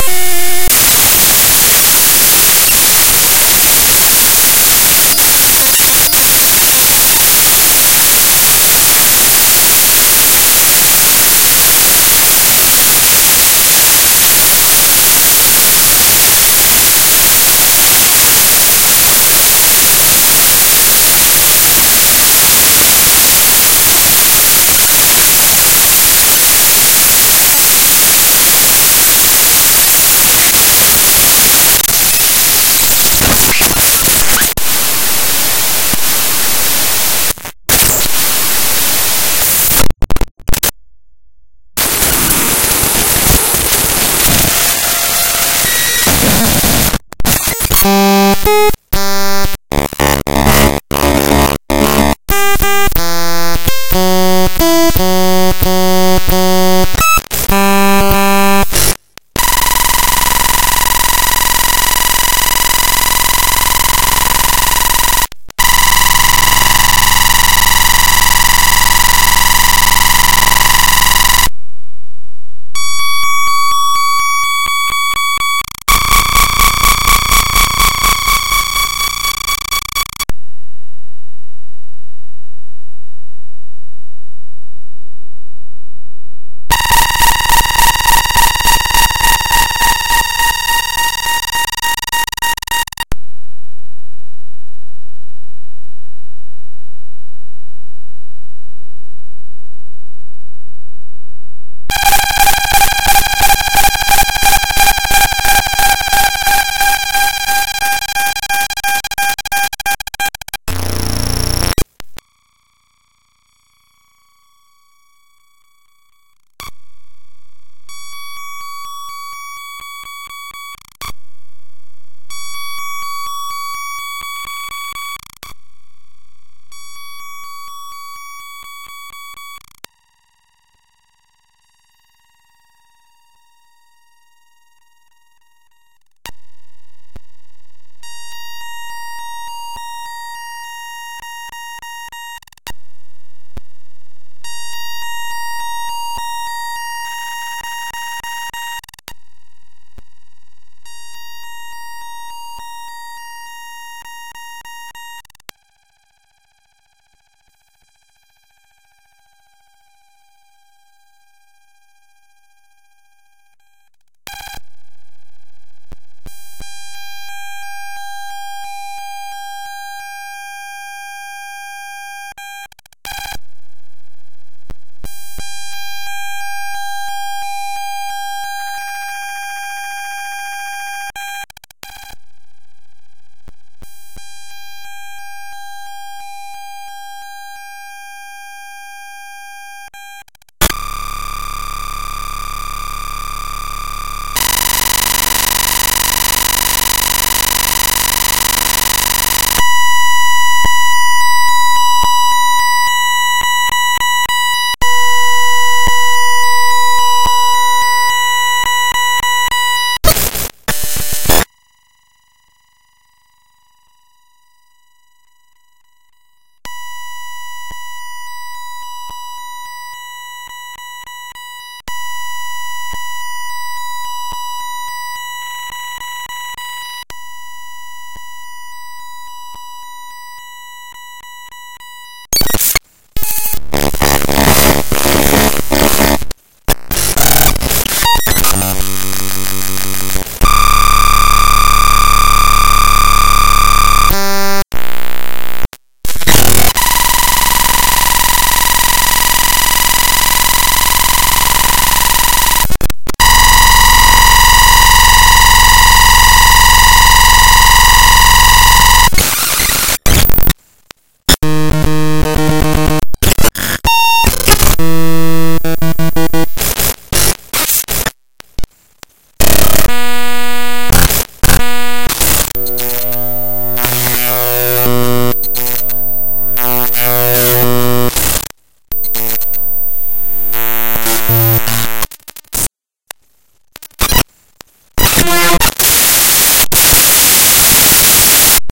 Massive as a sound
converted, glitch, noise, white